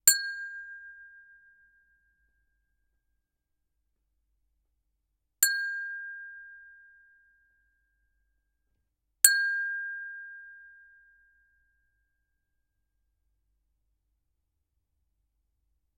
Metal gently hits the glass
Playing the glass with metal coin. Studio. Close mic.
Metal, bell, glass, ringing